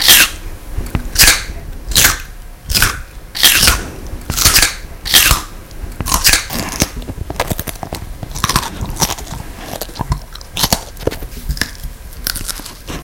Carrot Crunching Intense
Eating a crunchy carrot increased db levels
food, vegetables, carrot, eating, crunchy